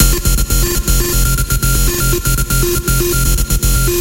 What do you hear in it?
AI Hackers Loop 120 BPM
A NI Massive self-made preset bounced and processed with Multiband Dynamics.
Dubstep, Glitch, Loop, Robot, Skrillex, Synthesizer